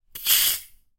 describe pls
Bicycle Pump - Plastic - Fast Release 11
A bicycle pump recorded with a Zoom H6 and a Beyerdynamic MC740.
Pressure, Pump